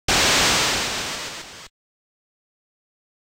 8-bit long slide
A video game sound effect made with Famitracker that could sound like an object sliding along the ground
game, long, move, old, retro, slide, swish, swoosh, video